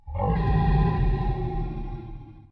necro-nooooooo - Fsh
necromancer death sound